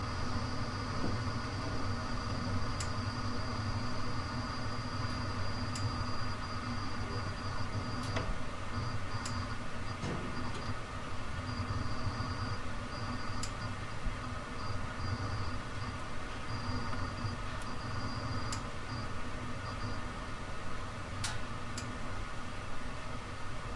gas boiler stand by
Stereo recording of a gas boiler running in a stand-by mode in a small boiler room.
quiet hissing, whizzling noise,
whizzling/whistling noise is after while somehow being interrupted
recorded from approx. 0,4 m
Except shortening unprocessed.
recorded on:
built-in mics, X-Y position
binaural, boiler-room, drone, heating, house, machine, mechanical, noise, unprocessed